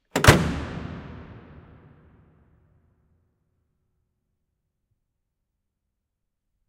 Hood Impact
The slam of a car hood in a huge underground car park. Recorded with a Rode NT4.
Big, Boom, Car, Close, Door, Hit, Hood, Impact, Metal, Resonant